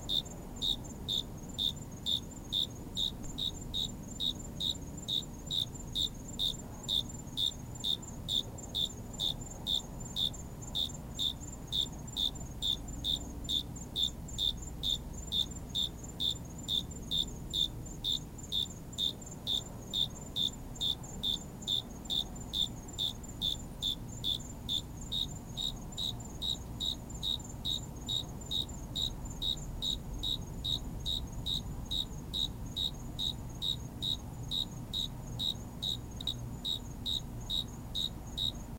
An evil insect scrapes his legs together as you scream in terror.